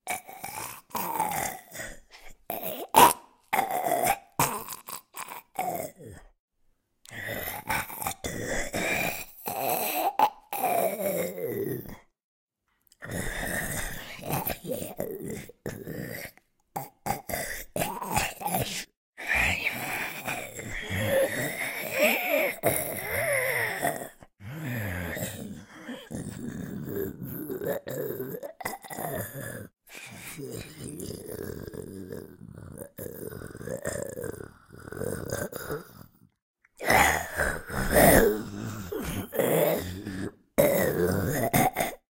Zombie noises made without post processing. Multiple variations available.